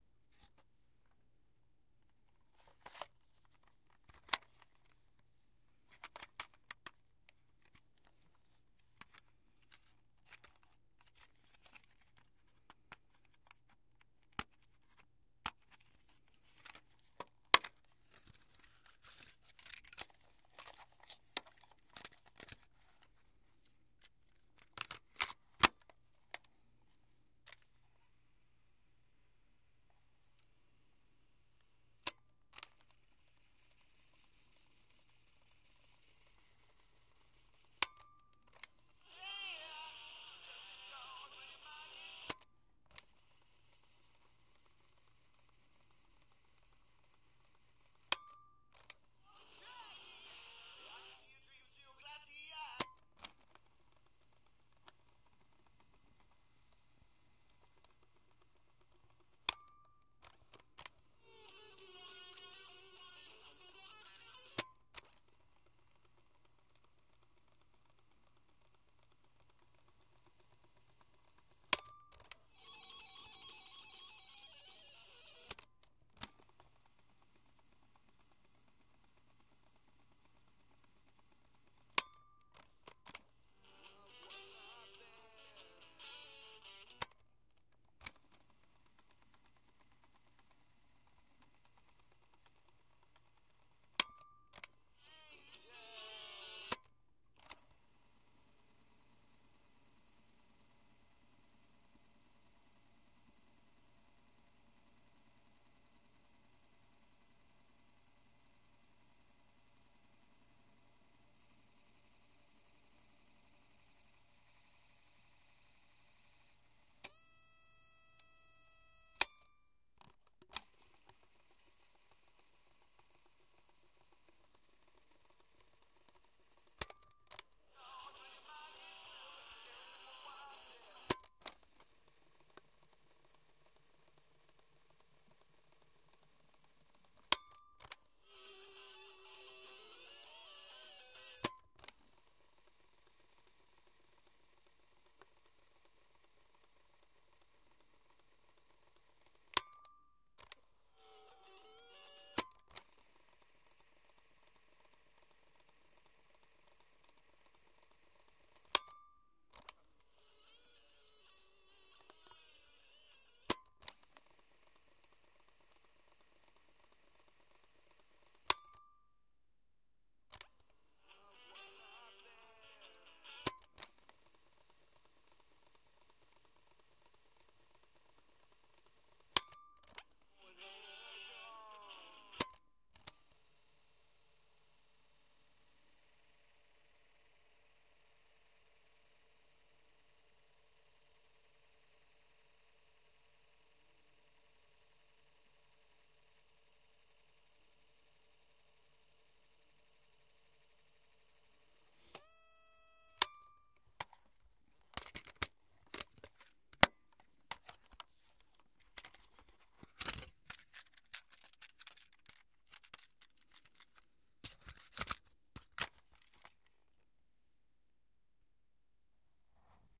CassettePlayer-RWD-FWD-STOP
This is a whole string of sounds involving a handheld cassette player, including removing the cassette from the case, rewinding, fast-forwarding, stopping, and playing. This is meant to be a wide range of sounds that you can edit and pull out just what you need.